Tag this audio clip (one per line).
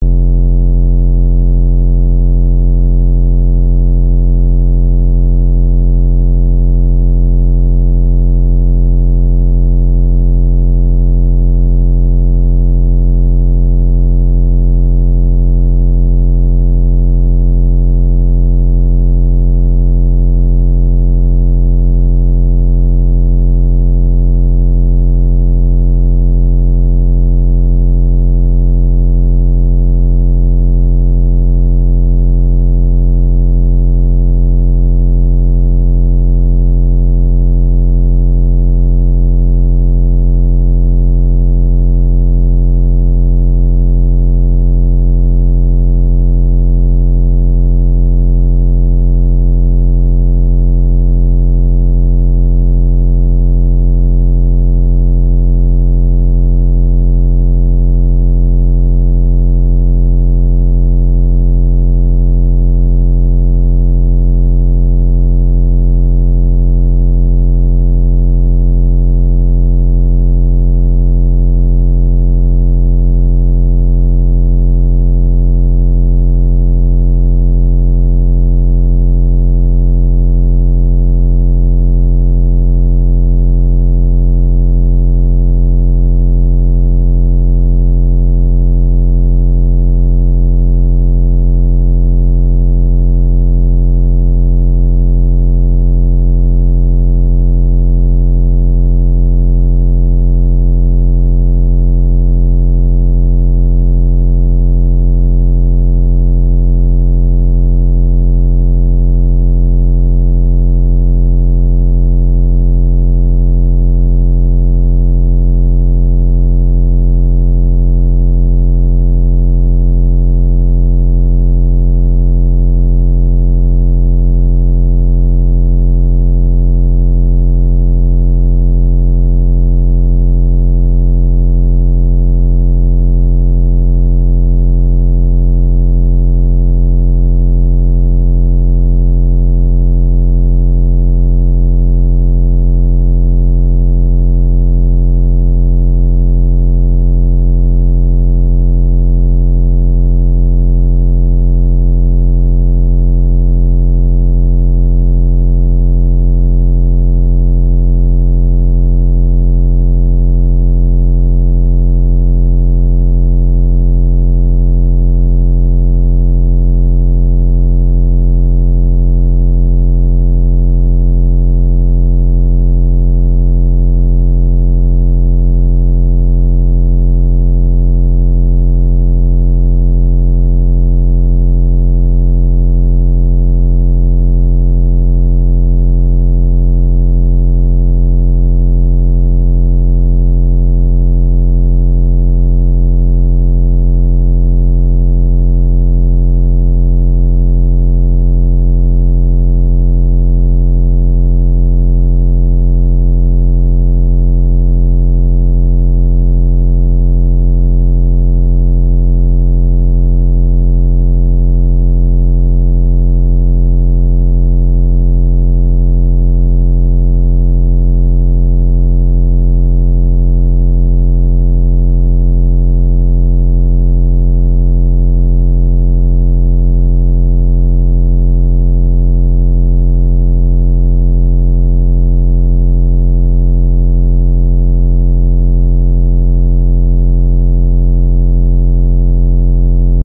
bass
beat
dubstep
kicks
rap